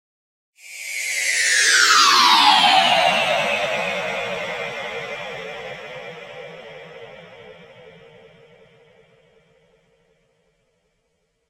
Sound Effect: Flight
Hissing sound, cartoon flying device sfx.
air, airplane, cartoon, flight, fly, hissing